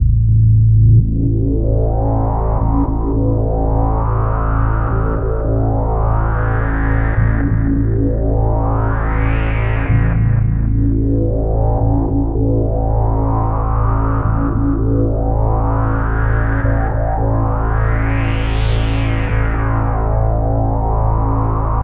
bass swell long 01 16bitmono 154bpm
This is a sample of me playing my 1976 Fender Jazz bass through a bunch of analog pedals.